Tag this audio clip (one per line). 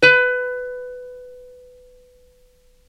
ukulele
sample